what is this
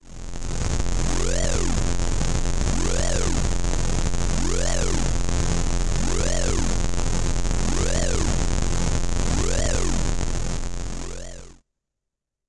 Static Emergency 2 (Medium)
A somewhat short fuzzy emergency alarm used in the earliest prototype spaceships, before they figured out how to transmit audio cleanly.
static, alarm, noise